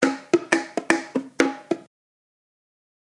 JV bongo loops for ya 1!
Recorded with various dynamic mic (mostly 421 and sm58 with no head basket)
bongo, tribal, loops, congatronics, samples